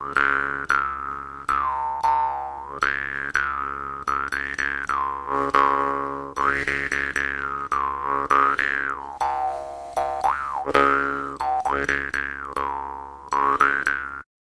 medium tempo jaw harp with breath cycle in the second half of the sample
harp, jaw, jews